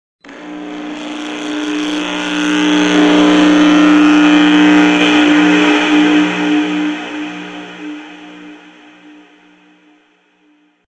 Cafetera digital accionada y capturada mediante un micrófono de contacto, la grabación fue procesada por medio de un DAW, añadiendo procesos de tiempo y frecuencia. Para realizar la edición de este sonido, se parte de que como fue captado, con un micrófono de contacto se necesitó reducir la amplitud del sonido por medio del plugin gain nativo de protools, posteriormente se le añadió mucha reverberación, por medio del plugin Valhalla y por medio de un EQ nativo se ecualizó para evitar posibles ruidos en frecuencias puntuales del sonido.